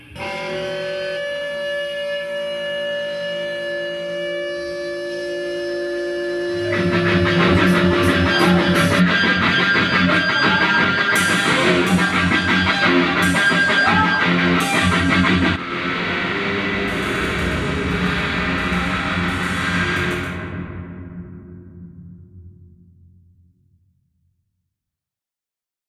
concert feed back, recorded with a tascam d-40 live sound in a concert.
bass, concert, electric, feedback, guitar, live, punk, rock, sound